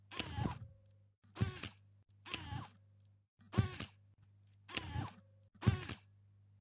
appareil photo ON OFF
Sound of the opening and closing of a digital camera from the beginning of the 2000's.
photograph, closing, opening, sony, camera